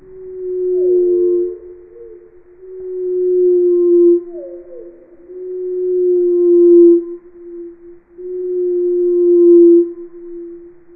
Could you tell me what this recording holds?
reinsamba Nightingale song sadcryembarassed-rwrk
reinsamba made. the birdsong was slowdown, sliced, edited, reverbered and processed with and a soft touch of tape delay.